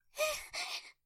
SCARED LONG GASP from little girl
Scared gasping of a girl for video games clear and HD.
clear, girl, gasp, ahh, little, hd, scared, scary